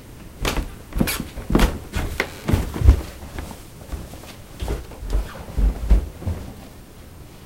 walk downstairs
A quick recording of a person walking down a double-flight of carpeted stairs. The recording was captured pretty clearly, despite my use of a cheap condenser mic for the recording.
downstairs, stairs, walk, down, recording